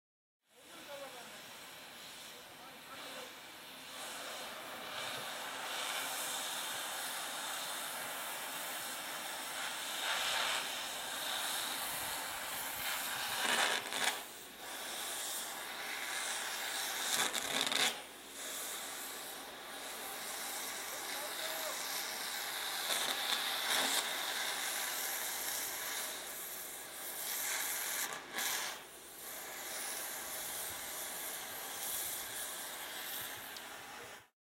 Cutting the carwash
Short recording from my apartment window of a carwash being cut in half. Not lots to hear, but interesting nonetheless.
cutting, cut, industrial, metal